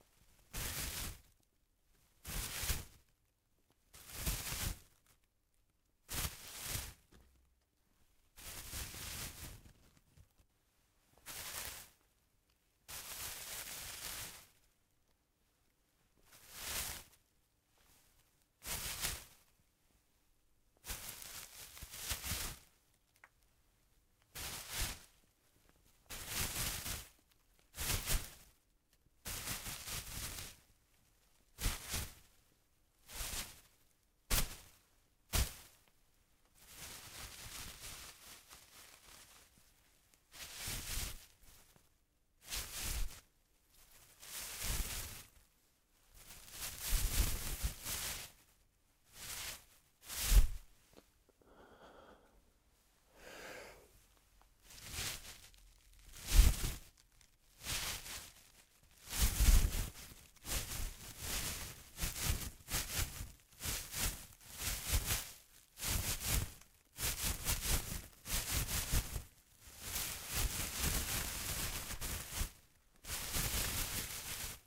PLANT FOLIAGE RUSTLE FOLEY MOVES
Recorded for an animation foley session using a Neumann TLM103 and a plastic synthetic plant.
BUSH, FOLEY, FOLIAGE, GARDEN, LEAF, LEAVES, MOVES, NEUMANN, OUTDOORS, OUTSIDE, PLANT, SFX, SPOTFX, TLM